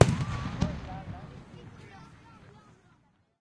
fireworks impact18
Various explosion sounds recorded during a bastille day pyrotechnic show in Britanny. Blasts, sparkles and crowd reactions. Recorded with an h2n in M/S stereo mode.
bombs, pyrotechnics, explosives, crowd, show, explosions, field-recording, blasts, display-pyrotechnics, fireworks